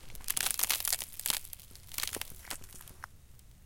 Ice Crack 3
foley, ice, crack, break, ice-crack, melt